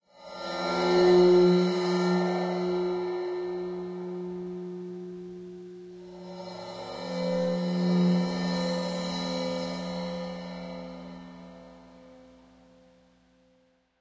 cYmbal Swells Royer-017
i was demoing the new Digital Performer 10.1 with a Royer R-10 pair in Blumlein array but wanted to check out one of the ribbons because it possibly sounded blown so i went ahead not bothering to create a new mono file. the mic was tracked through a Yamaha mixer into Digital Performer via a MOTU 624. i have various cymbals including a Paiste hi-hat and a Zildjian ride which i bowed or scraped. there is an occasional tiny bit of noise from the hard drive, sorry. it has the hiccups.
some of these have an effect or two like a flange on one or more and a bit of delay but mostly you just hear the marvelous and VERY INTERESTING cymbals!
all in my apartment in NYC.
cymbal-swell, ribbon-mic, Royer